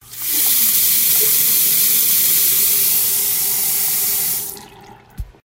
Sink Turning On - This is the sound of a sink turning on.
bathroom, sink, water